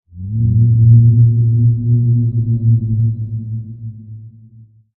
Organic moan sound